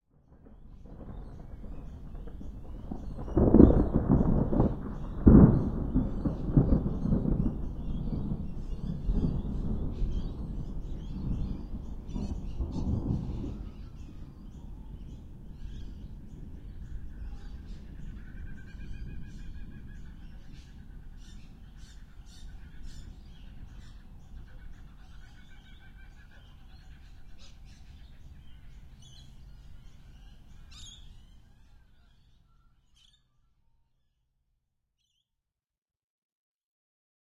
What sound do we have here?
Thunder & Kookaburra Magpies Parrots
After this thunder-clap you can hear a distant Kookaburra and some Magpies reply. I love it when it rains and thunders. Recorded from my back yard (under a veranda). The sounds of parrots and other birds can be heard as the thunder storm rolls in. Recording chain: AT3032 stereo mics (50 cm spacing) - Edirol R44 (digital recorder).
australia, storm, boom